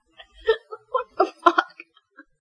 laugh what the f
i recorded my voice while watching and listening to funny stuff to force real laughs out of me. this way i can have REAL laugh clips for stock instead of trying to fake it.